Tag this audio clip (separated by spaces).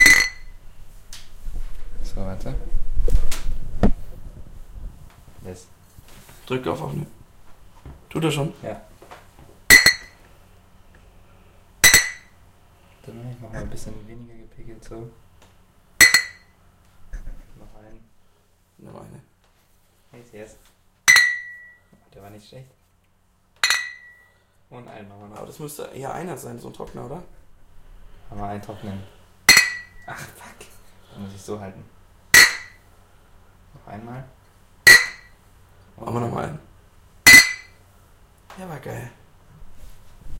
ansto glass en clink bier chink flaschen glasses beer prosten cheers prost klirr